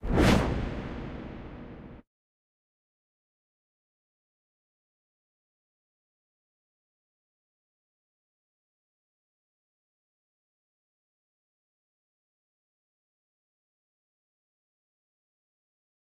reverbed whoosh
A movement and impact Foley sound for use in action,fantasy, science fiction